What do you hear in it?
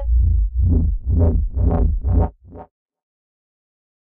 bass flappy
electronic, bass, loop, electro, synth, house, techno, rave, 120bpm, club, trance, dance, progression, bassline